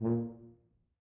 One-shot from Versilian Studios Chamber Orchestra 2: Community Edition sampling project.
Instrument family: Brass
Instrument: Tuba
Articulation: staccato
Note: A#2
Midi note: 46
Midi velocity (center): 95
Room type: Large Auditorium
Microphone: 2x Rode NT1-A spaced pair, mixed close mics